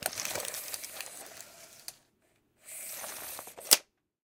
Measuring tape - pulling out and retracting.